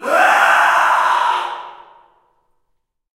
Male screaming in a reverberant hall.
Recorded with:
Zoom H4n